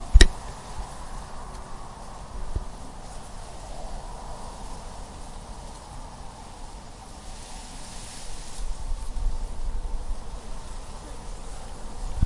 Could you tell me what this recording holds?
Un poco de Viento Sereno
What you will hear is wind running between the leaves, it is a relaxing sound. Recorded with a Zoom H1 recorder.
Sounds, Wind, Deltasona, Llobregat, Day, Nature